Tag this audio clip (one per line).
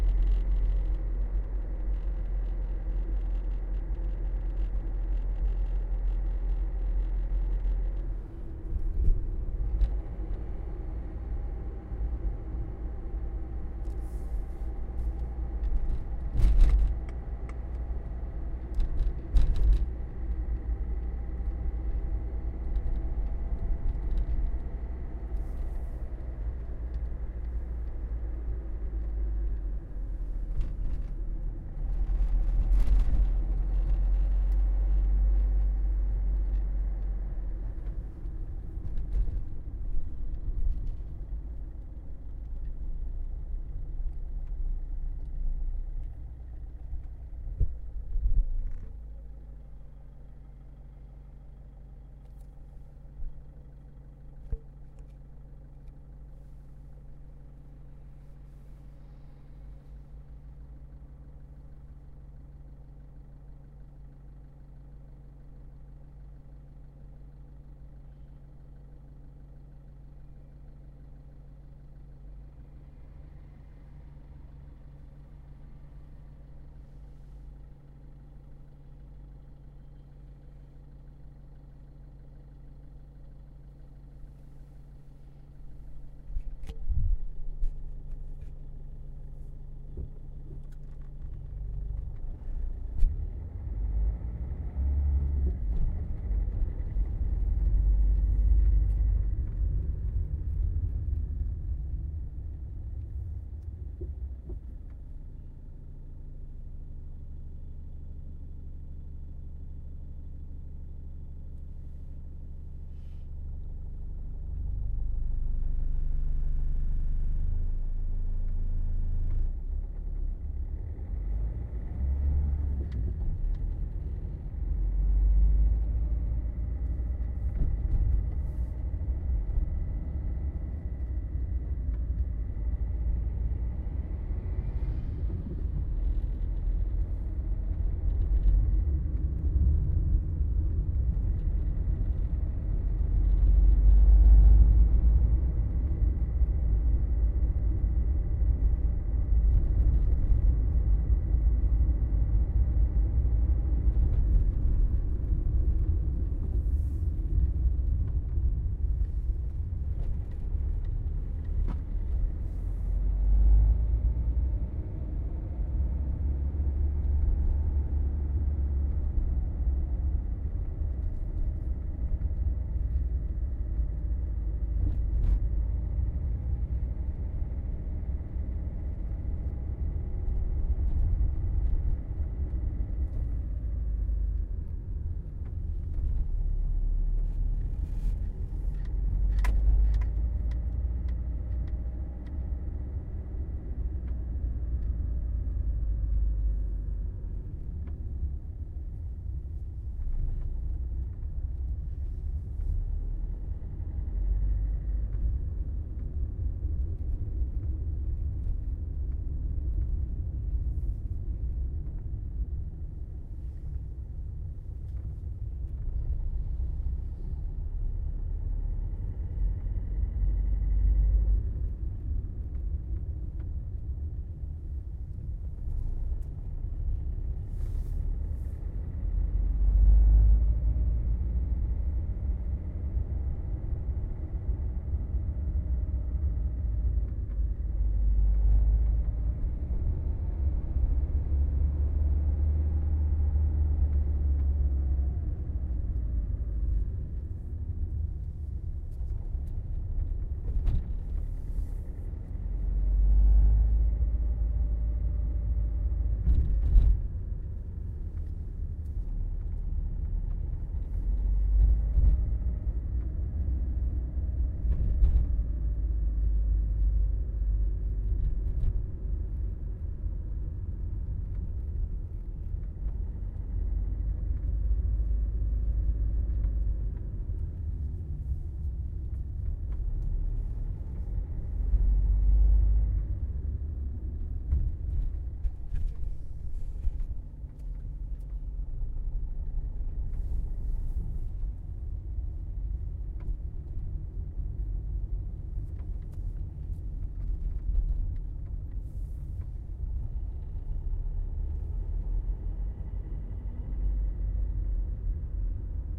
car
interior
rolled
up
windows